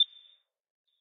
Short beep sound.
Nice for countdowns or clocks.
But it can be used in lots of cases.
a, beep, beeping, bit, computer, counter, digital, hit, menu, select